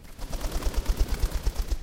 Flight of a pigeon. Clean sound
clean, Pigeon, sound, flying